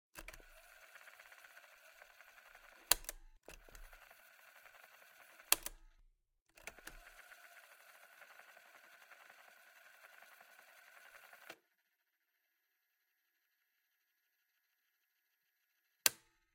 tape cassette machine Marantz pmd-222 rewind
Rewind sounds for the listed cassette recorder
sfx, postproduction, cassette, button, tape, sound-design, machine, AudioDramaHub